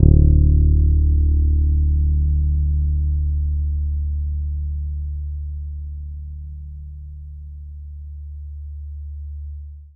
this is set of recordings i made to sample bass guitar my father built for me. i used it to play midi notes. number in the filename is midi note.
bass, electric, guitar, tone